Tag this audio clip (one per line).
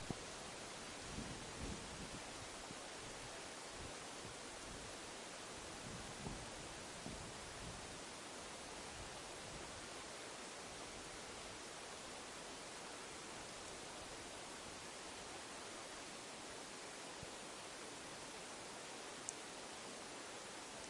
florida
island
seahorskey